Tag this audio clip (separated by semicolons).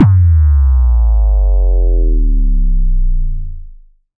sweep; electro; bass; dry; synth